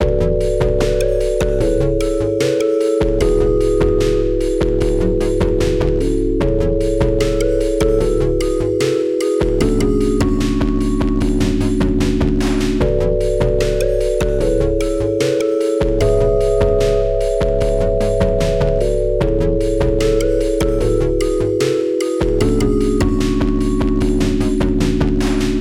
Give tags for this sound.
75bpm; Gb; reasonCompact; minor; loop; music

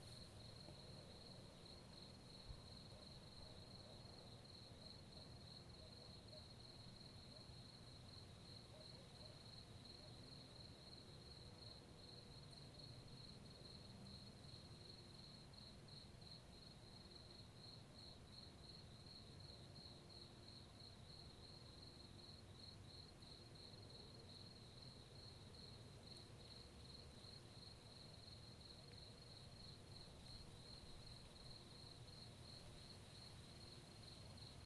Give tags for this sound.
insects; chirp; field-recording; outdoors; ambient; nature; ambience; outside; cricket; crickets; night; ambianca; nighttime

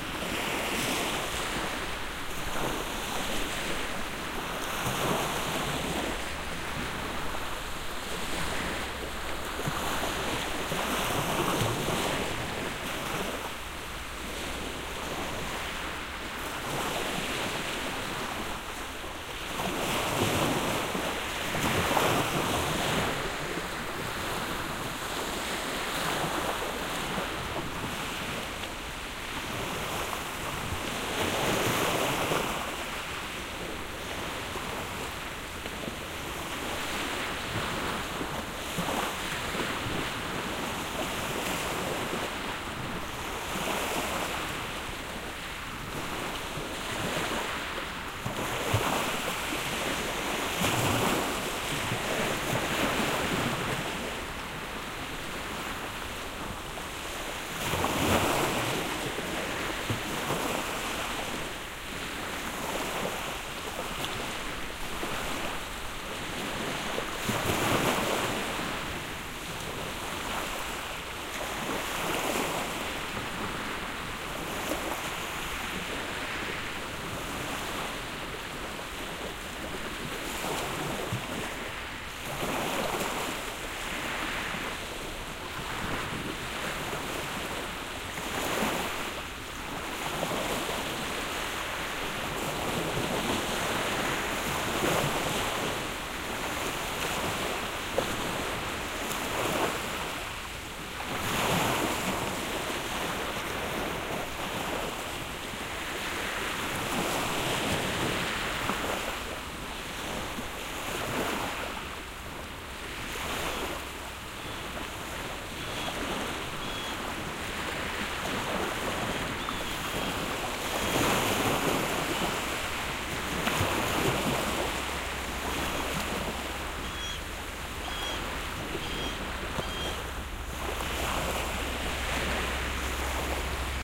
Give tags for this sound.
coast; shore

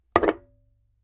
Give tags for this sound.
bowl; impact; wood

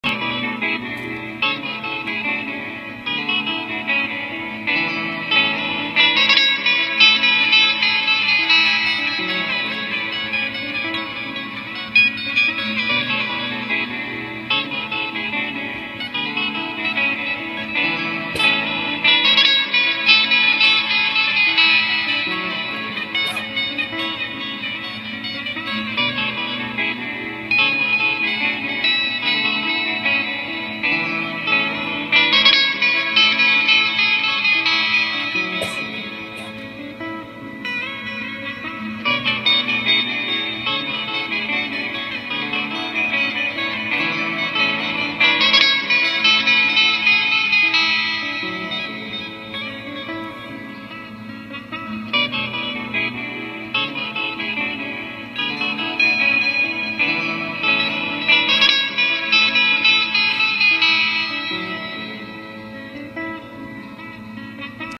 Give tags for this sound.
clean; guitar; layered; loop

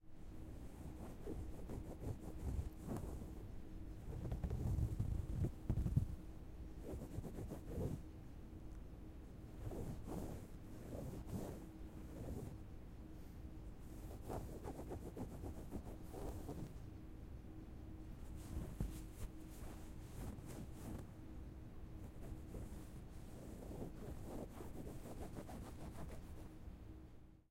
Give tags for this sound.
cat; furniture; OWI; pillow; scratching; soft

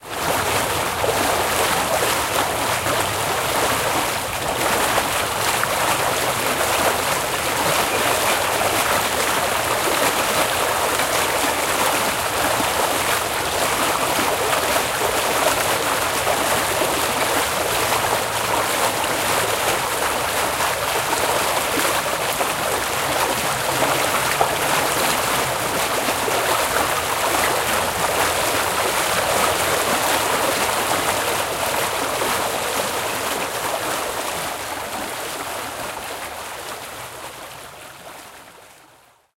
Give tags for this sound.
pipe; river; rushing; water

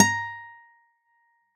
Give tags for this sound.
1-shot; acoustic; guitar; multisample; velocity

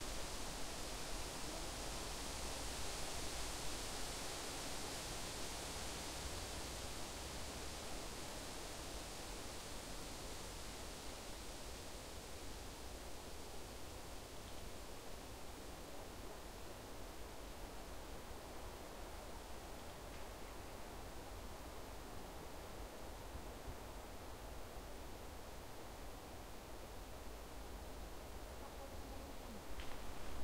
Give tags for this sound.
ambiance; ambience; ambient; atmosphere; field-recording; forest; nature; noise; soundscape; trees; wind

